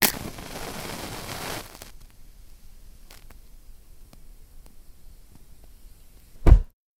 Burning a match stick. Recorded with a Zoom H2.